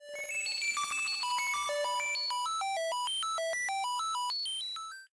Retro Melodic Tune 12 Sound
High tone melodic tune useful for retro scenes with bells.
Thank you for the effort.
Chiptune, game, computer, loop, melody, tune, original, 8bit, retro, sample, sound, effect, school, old, melodic, cool